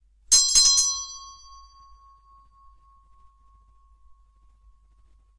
The sound of a brass bell, about 10 cm in diameter, being rung several times, in a small room. Recorded with a TSM PR1 portable digital recorder, with external stereo microphones. Edited in Audacity 1.3.5-beta on ubuntu 8.04.2 linux.